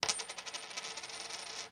Clad Quarter 5
Dropping a quarter on a desk.